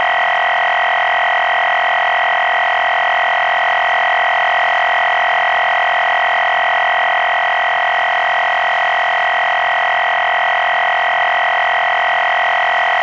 Various recordings of different data transmissions over shortwave or HF radio frequencies.
drone, shortwave